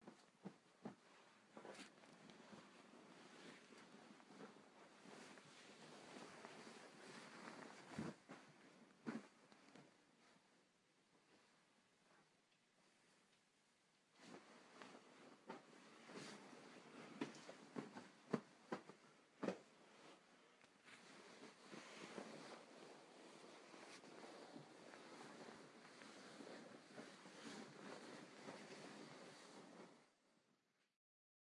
Bed Sounds
Someone getting in to bed, pulling covers down, fluffing pillow, then tossing and turning to get comfortable.
bed, blankets, pillows